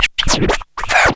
Scratching vocal phrase. Sounds like "wi-tsh-sh-wi-without". Recorded in cAve studio, Plzen, 2007
you can support me by sending me some money:
chop, dj, phrase, record, scratch, scratching, stab, turntablism, vinyl, vocal, whisper